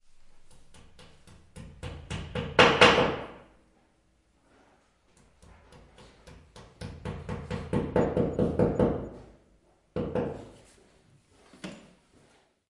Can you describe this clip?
Sound of a hammer. Sound recorded with a ZOOM H4N Pro.
Son de marteau. Son enregistré avec un ZOOM H4N Pro.